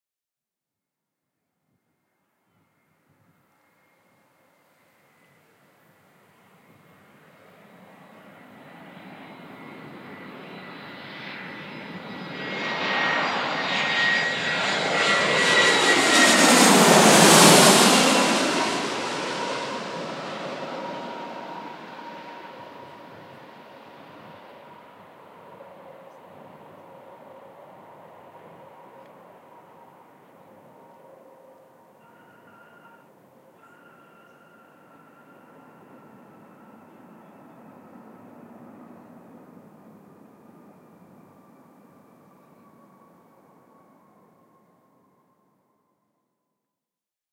Recorded at Birmingham Airport on a very windy day.